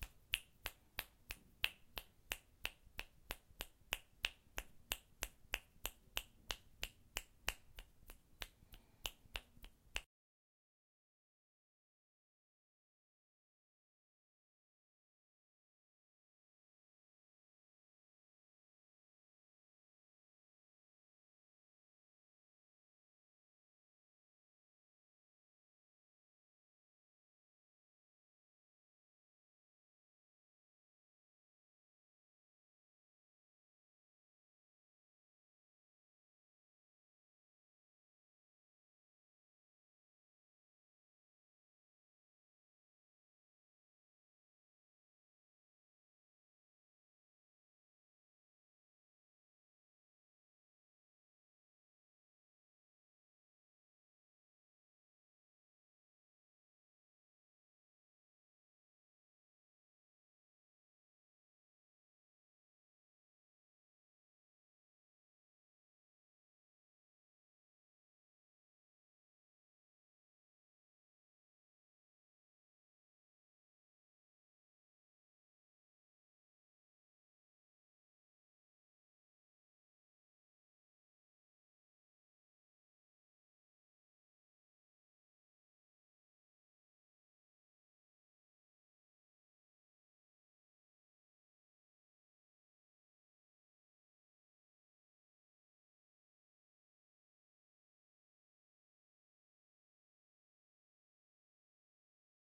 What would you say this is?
You can hear the snapping of fingers.
finger-snap, fingers, snapping